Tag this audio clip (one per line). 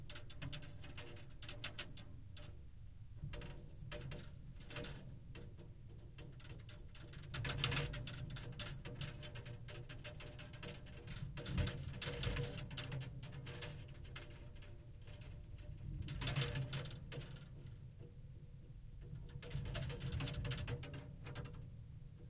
ambient
broken
experimental
field-recording
piano
texture